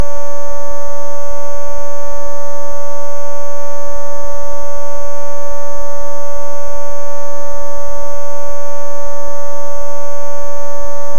Filtered sawtooth
Same as Laser_sustained but without fade in.
laser, noise, sawtooth, synthesized